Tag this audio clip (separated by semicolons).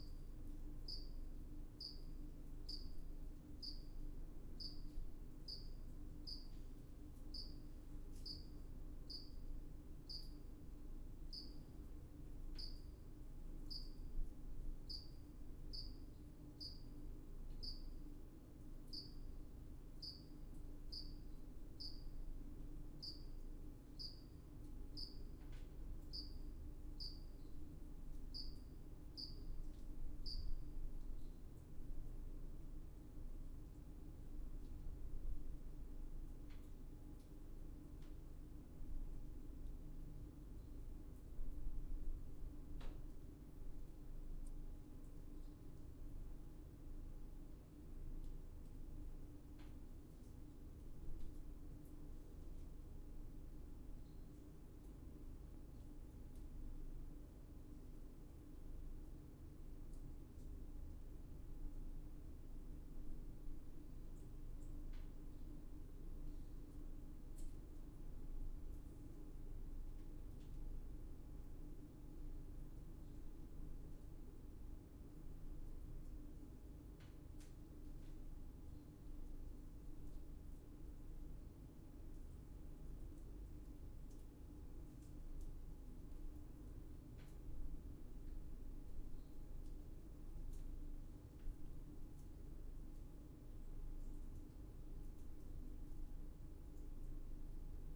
crickets; calm; field-recording